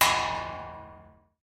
Quick hit on a strangely shaped sheet-metal.